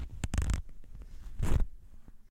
Opening and closing a zipper in different ways.
Recorded with an AKG C414 condenser microphone.
3naudio17, backpack, clothing, uam, zipper